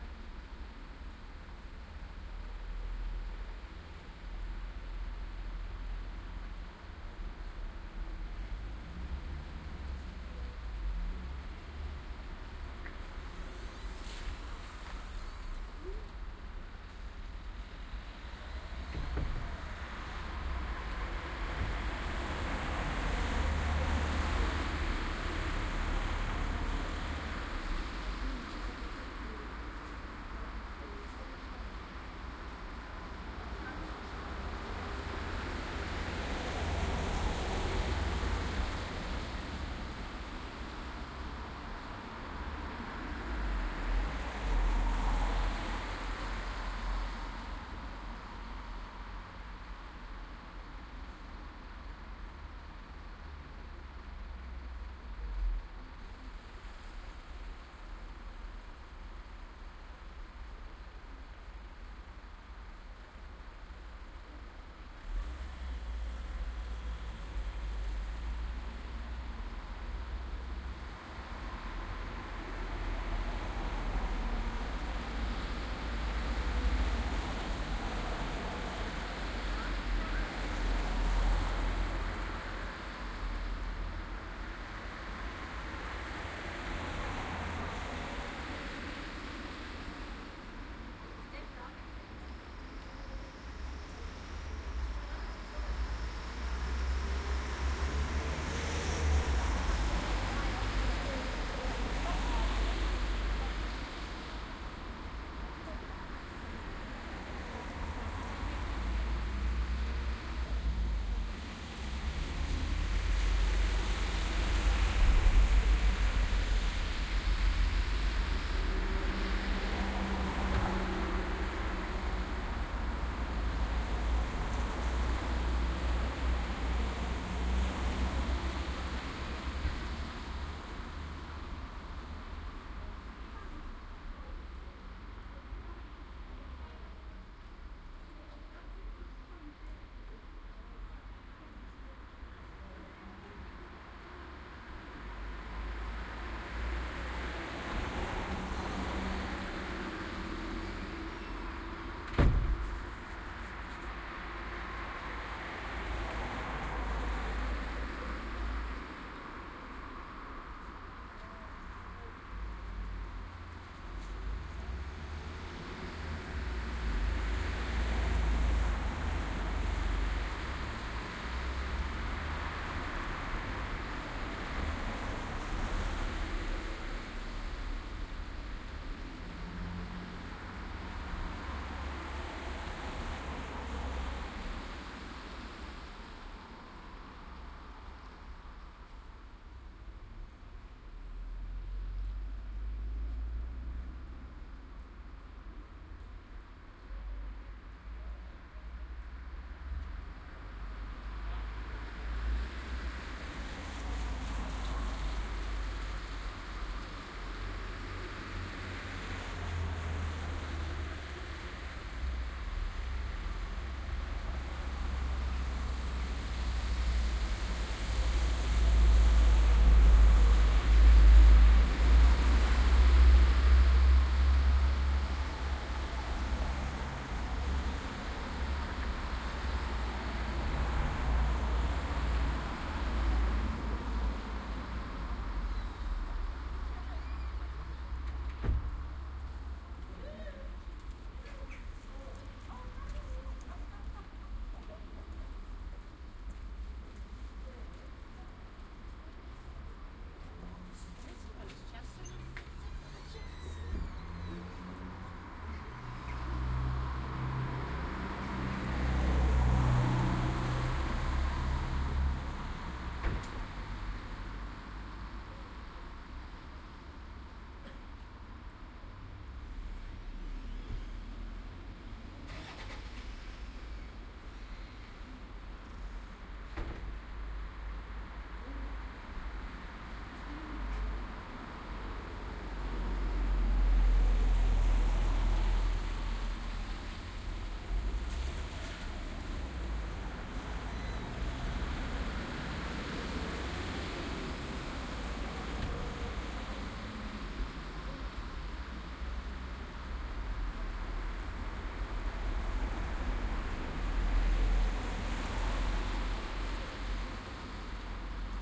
The typical sound of a mainstreet in a small town. This track was recorded in Pitlochry / Scotland, using the Soundman OKM microphones, an A3 adapter into the Edirol R-09 HR recorder. You can hear cars, people walking by and a squeaky shopdoor.
binaural, city, field-recording, scotland, street, town, traffic